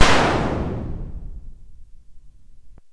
Made with a KORG minilogue